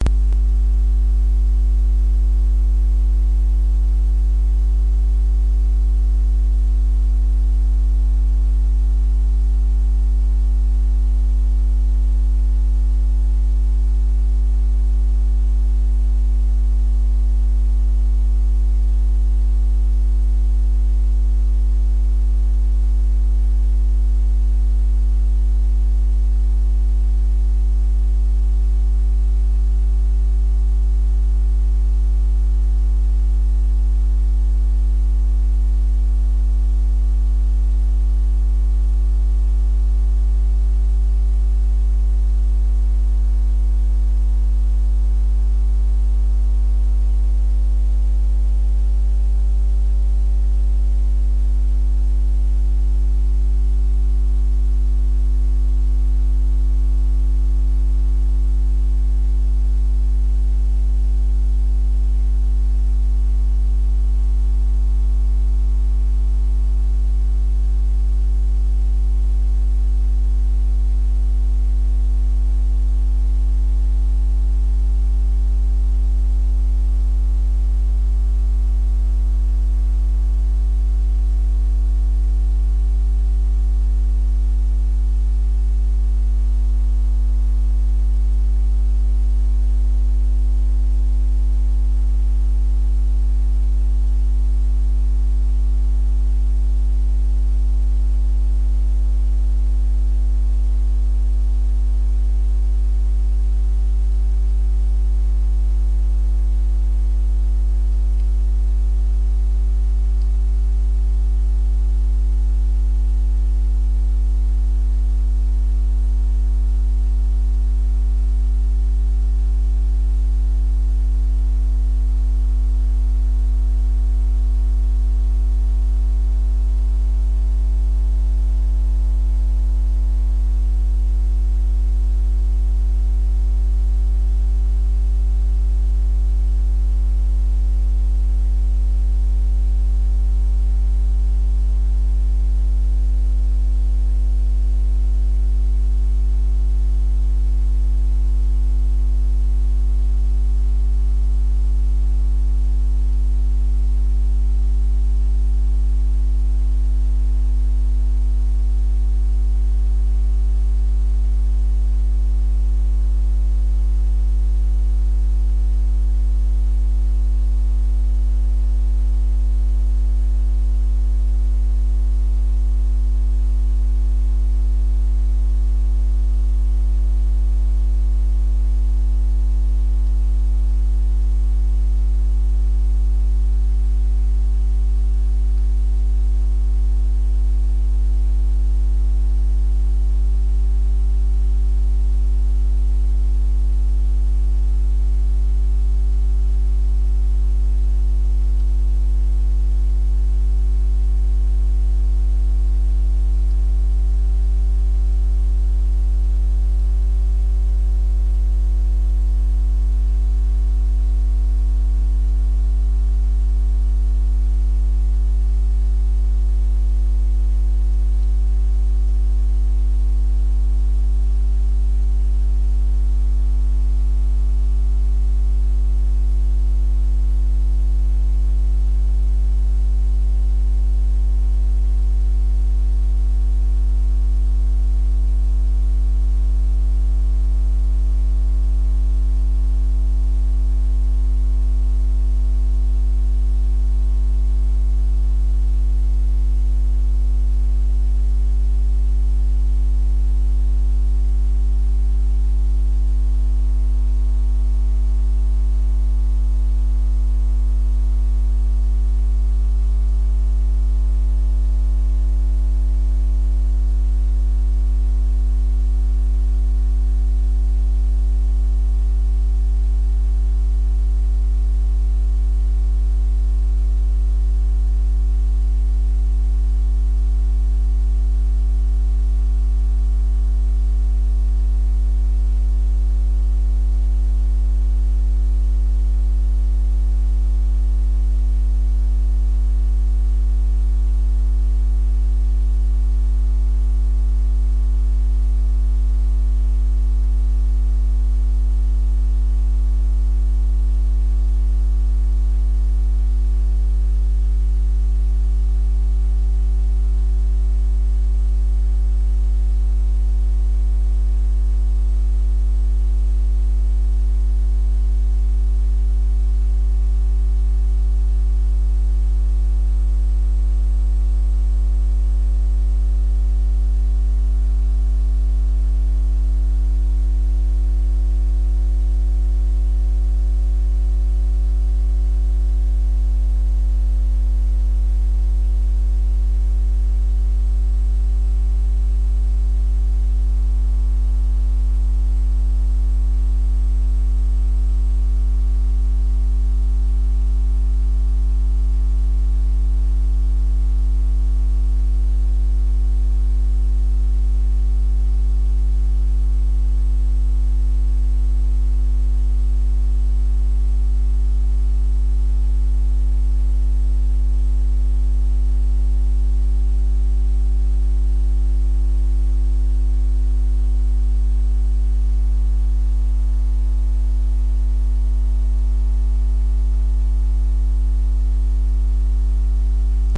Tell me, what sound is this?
Engine Control Unit ECU ATV Trail Running Type Triphase Fraser Lens Raspberry Amstrad CPC Chipset Tune Echo Wavelength Iso Synchronous Whirlpool Power Battery Jitter Grid Way ICU Ad Hoc